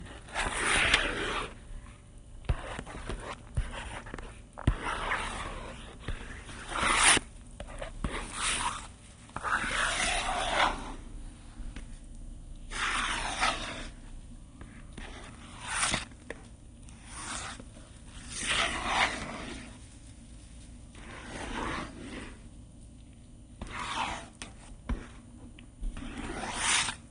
This is the lil' Wifey lathe & plastering the walls, or mixing creams, or ice skating...😁